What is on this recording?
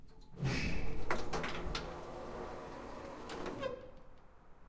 elevator door, city, Moscow
Elevator door opening, some ambience from outside the building.
Recorded via Tascam Dr-100mk2.